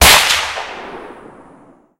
Centerfire Rifle Gun Shot 02
Centerfire rifle gun shot!
If you enjoyed the sound, please STAR, COMMENT, SPREAD THE WORD!🗣 It really helps!
attack, centerfire, fire, firing, game, gun, hunt, rifle, shot, sniper, sniper-rifle, target, trenches, warfare, weapon